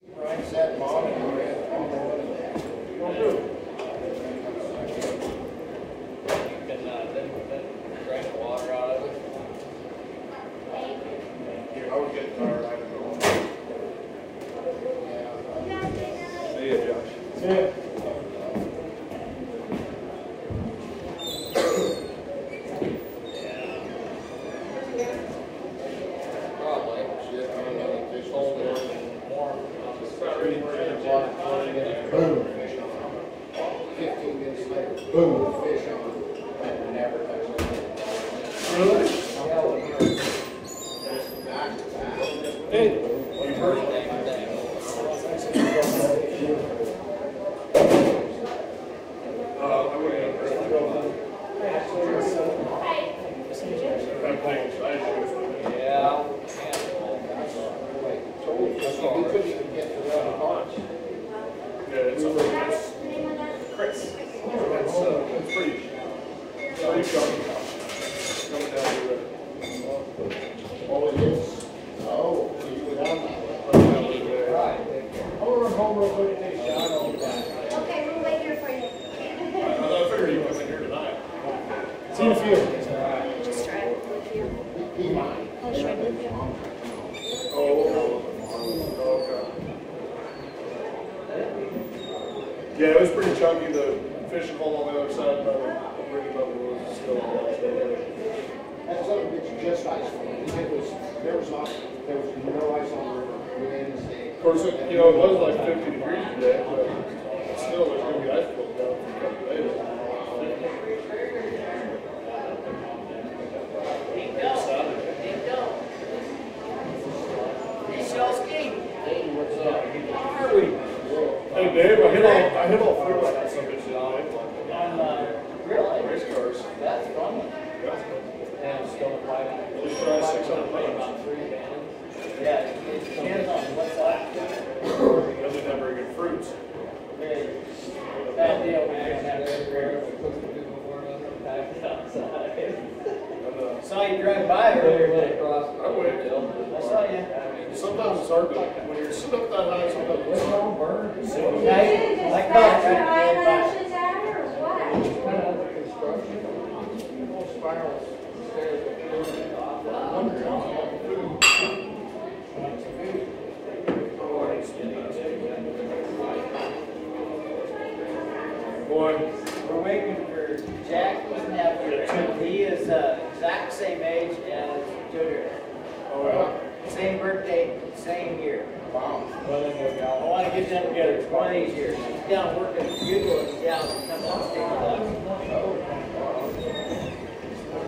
This recording was made at the Lean-To, a small-town bar and grill in Wasco, Oregon. Several people chatter and talk.
Recorded with: Sound Devices 702T, Sanken CS-1e